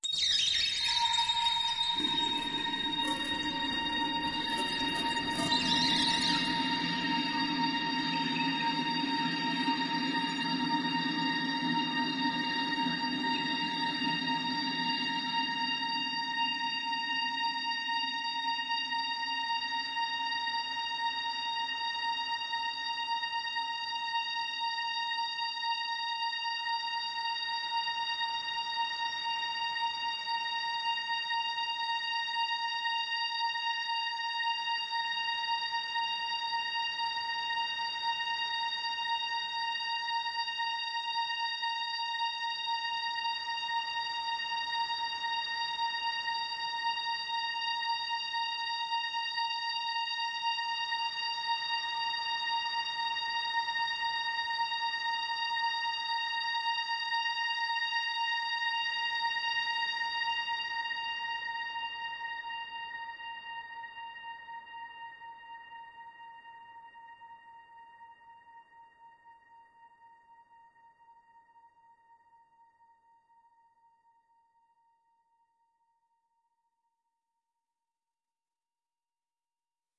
LAYERS 005 - Heavy Water Space Ambience is an extensive multisample package containing 97 samples covering C0 till C8. The key name is included in the sample name. The sound of Heavy Water Space Ambience is all in the name: an intergalactic watery space soundscape that can be played as a PAD sound in your favourite sampler. It was created using NI Kontakt 3 as well as some soft synths within Cubase and a lot of convolution (Voxengo's Pristine Space is my favourite) and other reverbs.